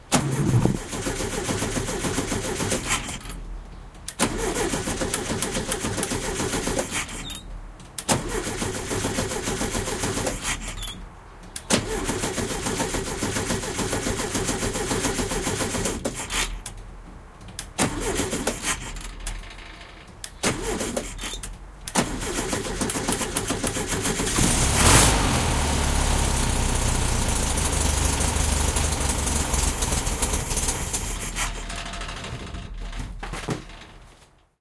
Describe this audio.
1970 VW Bug Engine Cranking
Using a Zoom H2n to record the sound of my 1970 VW Beetle as I started it. It hadn't been started in a couple of months and needed extra cranking.
Bug, engine, starting, VW